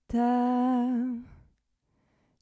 Female Vocal SIngle Note B
Single notes sung and recorded by me. Tried to name the pitch so you could organize it better
sing, female, voice